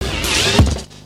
Pitch-warped cymbal sliding down to echoing bass drum.Taken from a live processing of a drum solo using the Boss DM-300 analog Delay Machine.